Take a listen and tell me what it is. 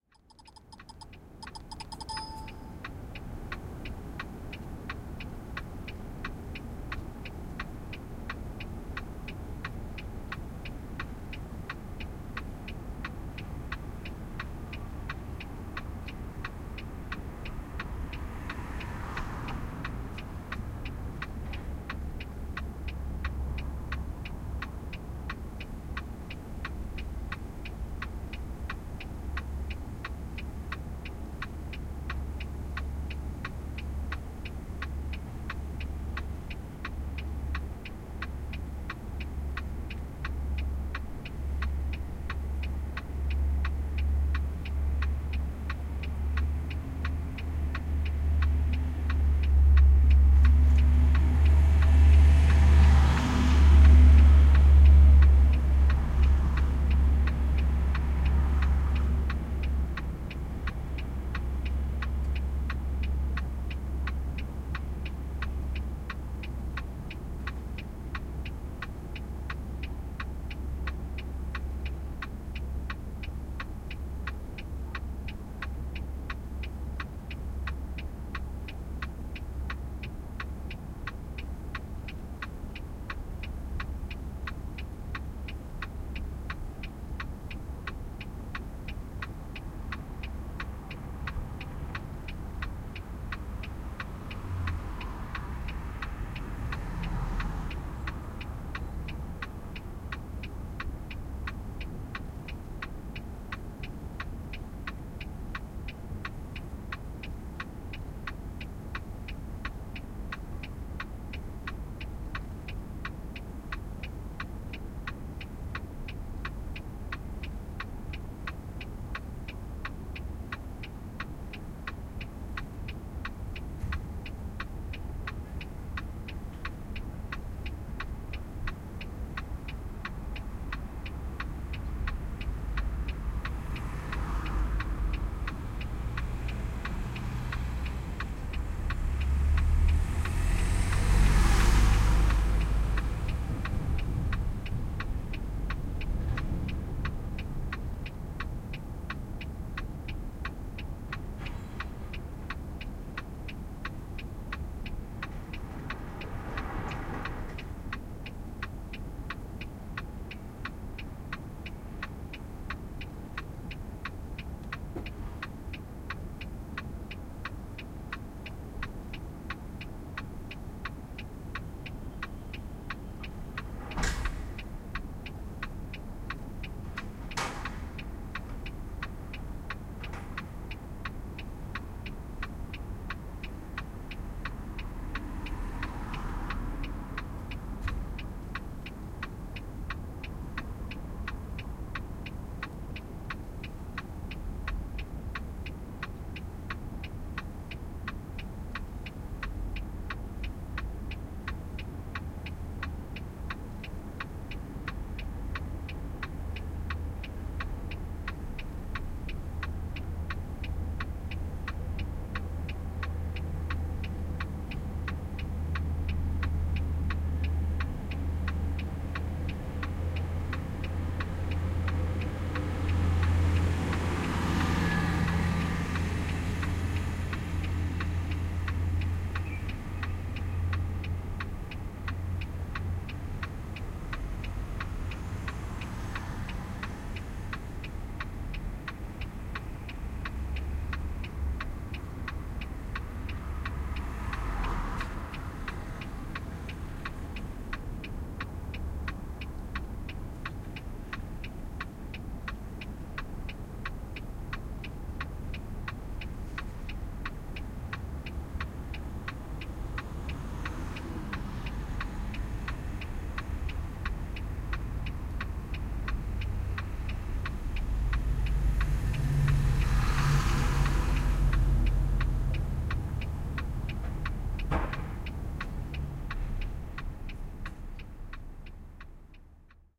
110819-industrial ambience in the center of hamburg
19.08.2011: twentieth day of ethnographic research about truck drivers culture. Hamburg in Germany. the industrial zone in the center of Hamburg. Waiting for unload. Recording made inside the truck cab. Sound of the blinker and passing by cars and trucks.